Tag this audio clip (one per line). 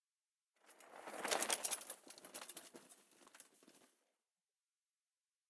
approach
chain
click
freewheel
park
ride
terrestrial
whirr